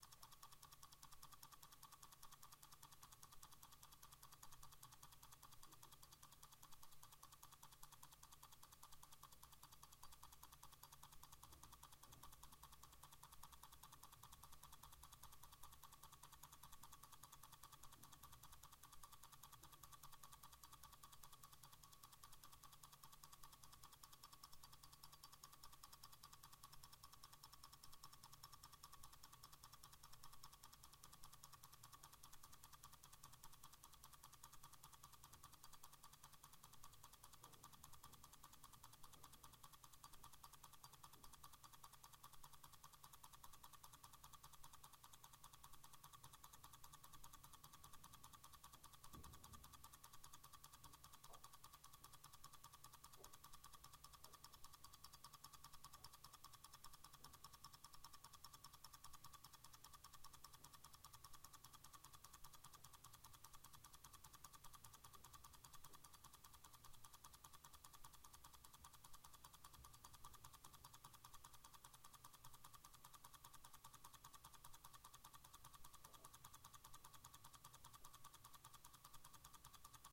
Swiss Stopwatch
Ticking movement from a A.R. & J.E. MEYLAN SWISS MADE Type 200A jeweled stopwatch.
Swiss, clock, stop, stopwatch, tick, tick-tock, ticking, time, watch